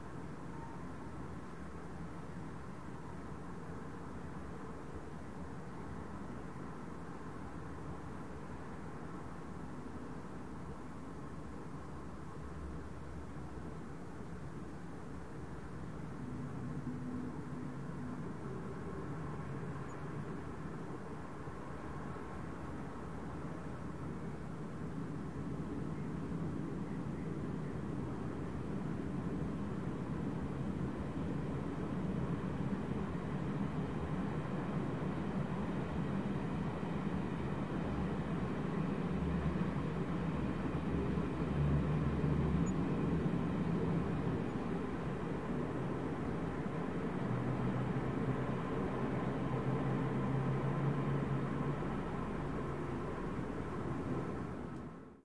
Skyline Residential Traffic Distant
Distant, London, Residential, Skyline, Traffic